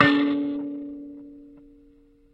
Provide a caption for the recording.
96kElectricKalimba - M3harmonic
Tones from a small electric kalimba (thumb-piano) played with healthy distortion through a miniature amplifier.
amp, bleep, blip, bloop, contact-mic, electric, kalimba, mbira, piezo, thumb-piano, tines, tone